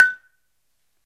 A balafon I recorded on minidisc.
africa, balafon, percussive, wood